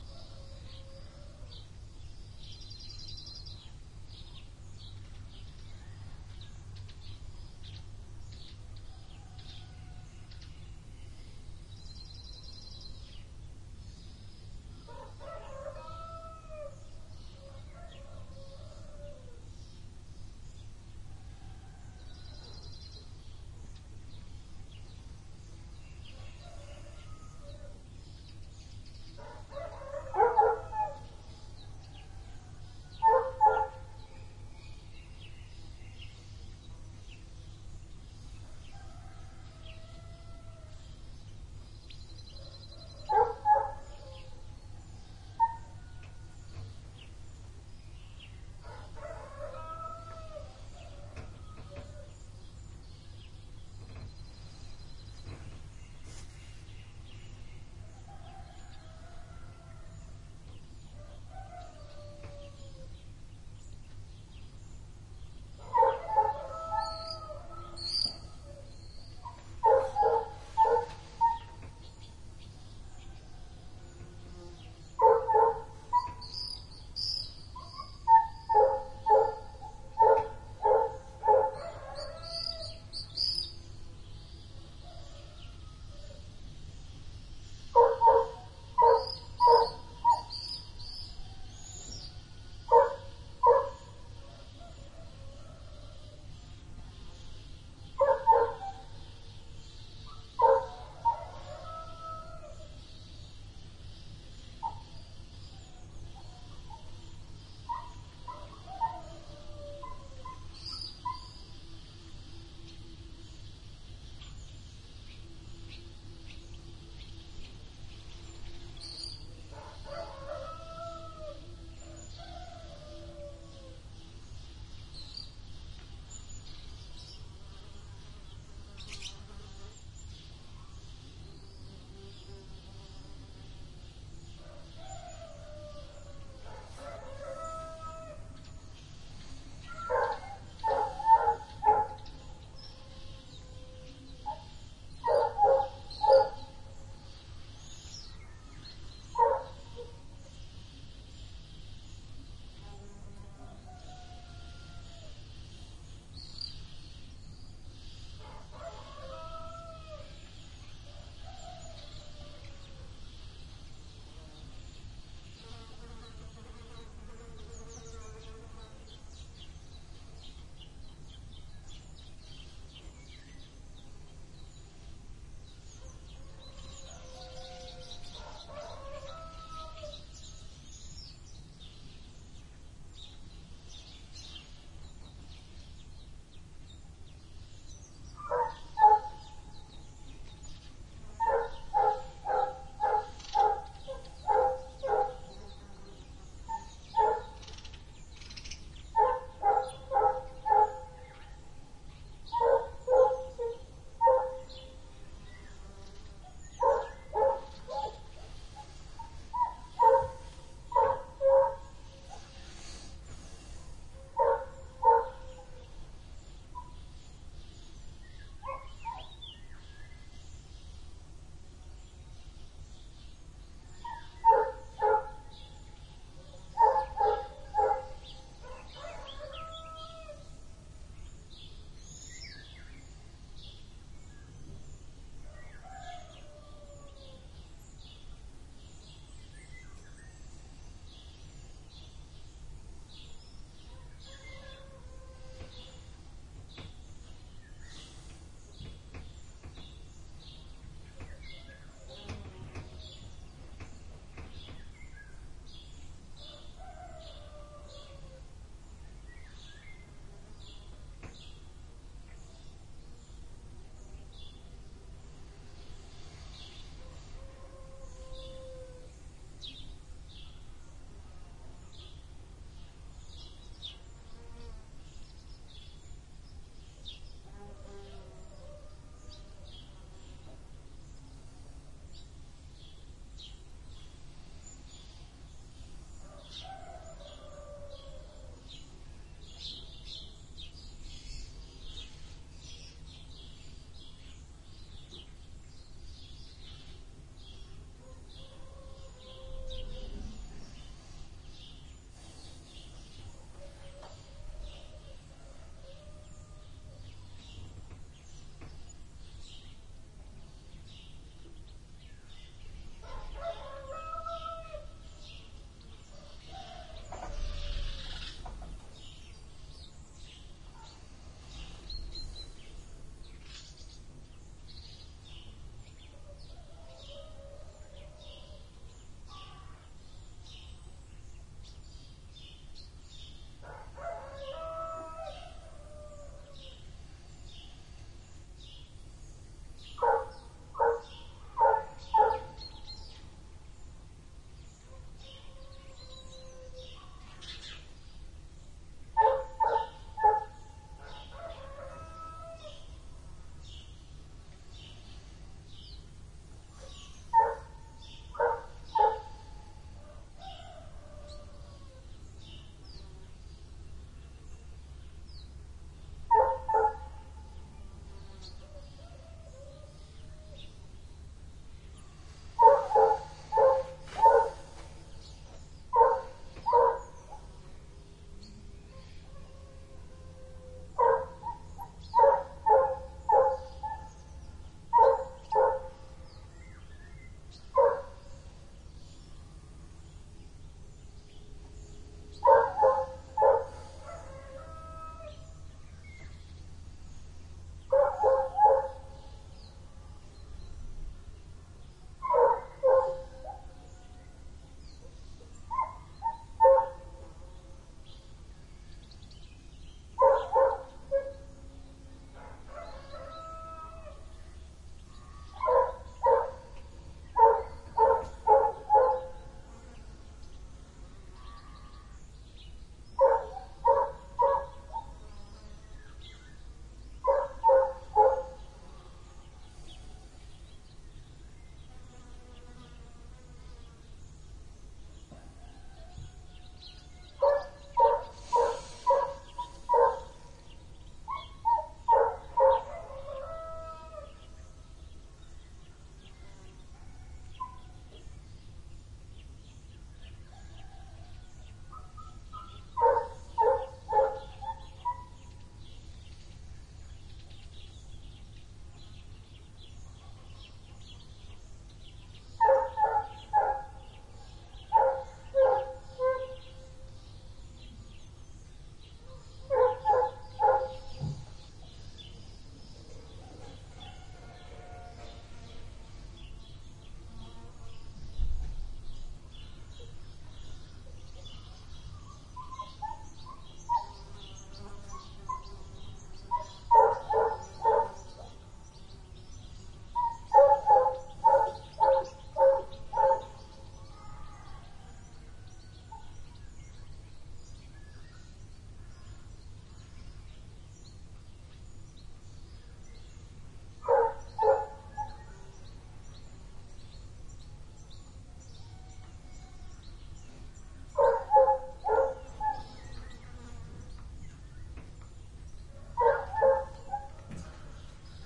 Longish take of early morning ambiance in a very small village surrounded by forest: rooster, a barking dog, insects, and bird calls (swift, swallow, Golden Oriole, Sparrow). Some noise from people in the house can also be heard at times, but no lowfreq hum. Recorded at the small village of Orellán (Leon province, NW Spain) with Primo EM172 capsules, FEL Microphone Amplifier BMA2, PCM-M10 recorder.